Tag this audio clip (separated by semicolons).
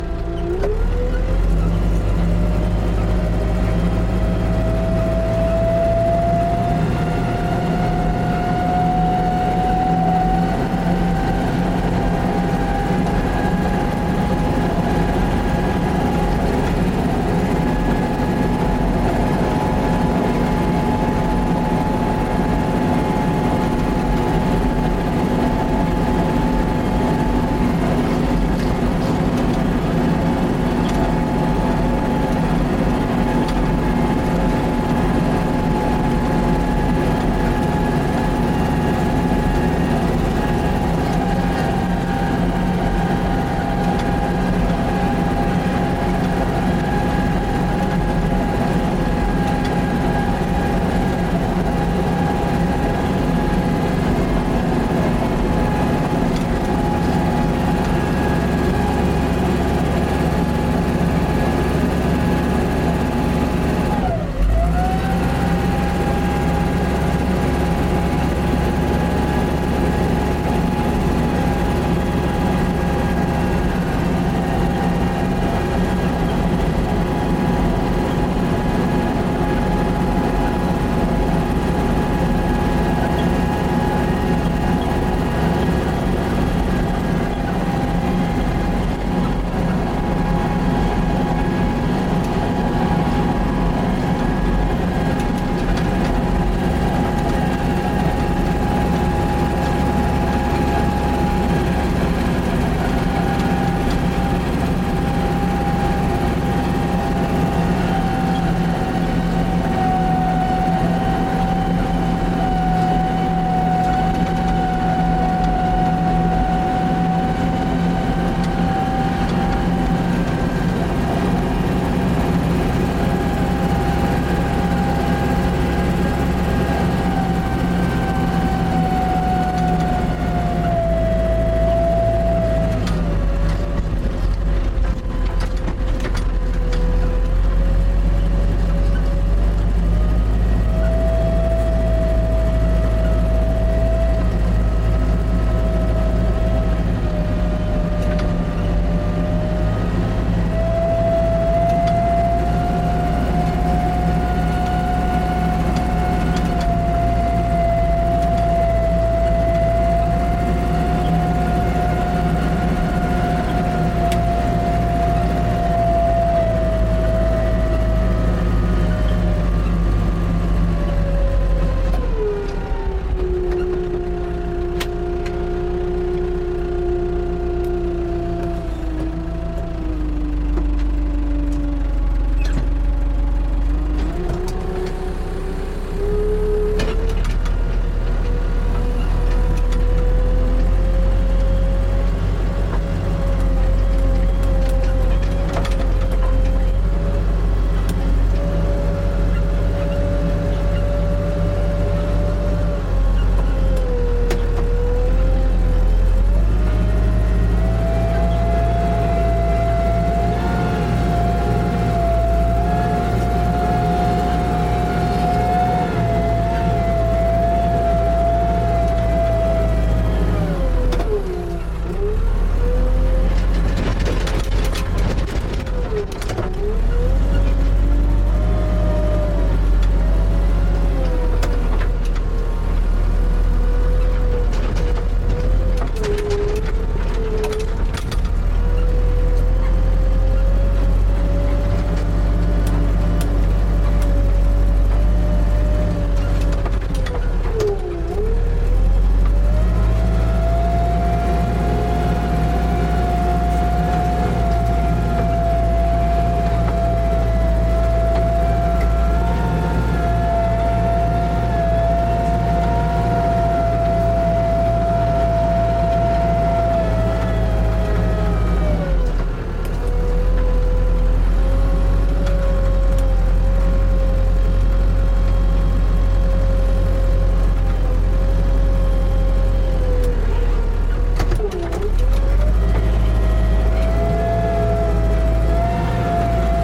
vehicle; drive; machine; effect; excavator; interior; clunk; mechanical; heavy; acceleration; sound